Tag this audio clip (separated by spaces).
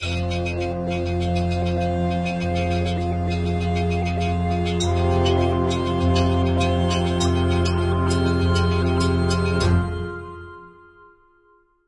ending epic filmscore filmusic solo-strings